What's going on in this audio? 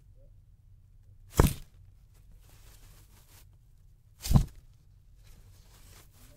Dragon Wing Flap
A dragon (or large flying bird) wing flap.